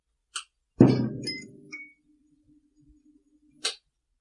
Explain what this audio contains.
Fluorescent lamp start 7
fluorescent tube light starts up in my office. Done with Rode Podcaster edited with Adobe Soundbooth on January 2012
start, switch, fluorescent, light, office